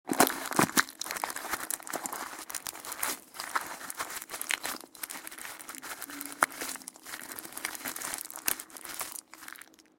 delphis MARLBORO PACKET CRUNCH
MARLBORO CIGARETTE PACKET CRUNCHING IN MY FINGERS
packet, folie, crunch, marlboro, delphis